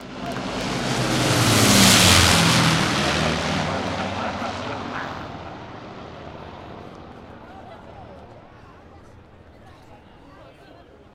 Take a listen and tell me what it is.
Fly past recorded at Santa Pod using a Sony PCM-D50.